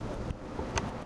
Church bell Katwijk
Church bell from Old Curch, Katwijk Netherlands